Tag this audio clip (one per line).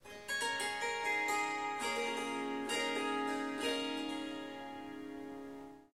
Ethnic
Harp
Indian
Melodic
Melody
Riff
Strings
Surmandal
Swarmandal
Swar-sangam
Swarsangam